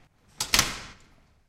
A door closing. Recorded with a Zoom H2. Recorded at Campus Upf.